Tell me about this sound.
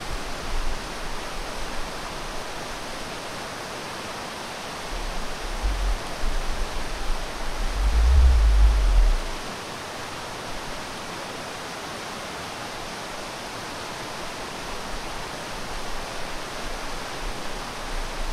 Waterfall sound from Iceland. Recorded by Zoom h2